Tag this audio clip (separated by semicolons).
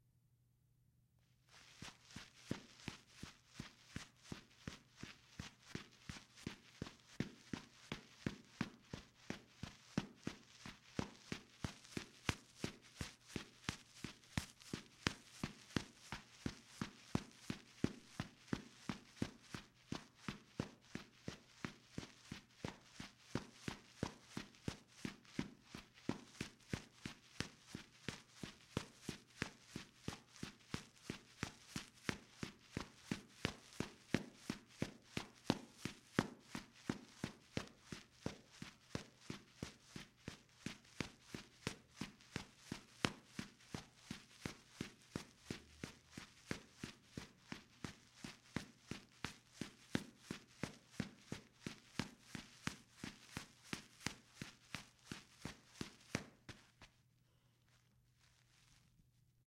Foley; RunOnGrass; RunningOnGrass; Running; SFX; Run